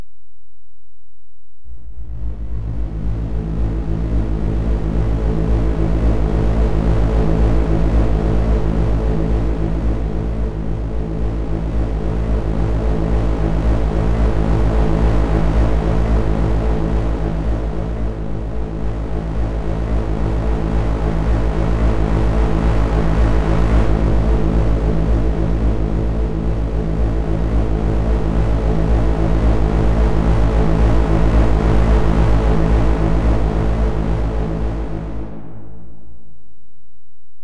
Even MORE SYnthetic sounds! Totally FREE!
amSynth, Sine generator and several Ladspa, LV2 filters used.
Hope you enjoy the audio clips.
Thanks